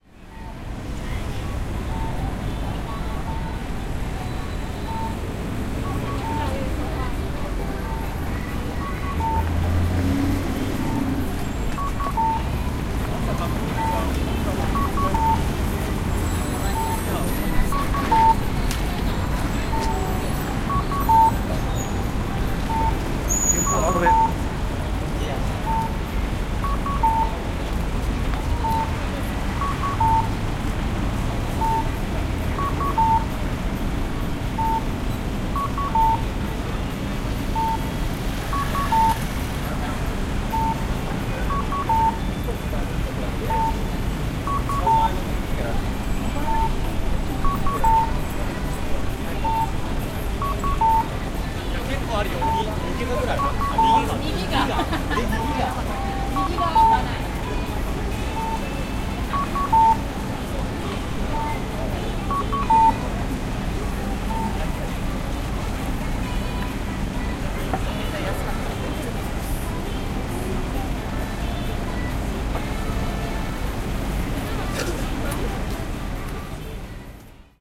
Traffic light for pedestrians. People talking in Japanese. Traffic. Music in the background.
20120807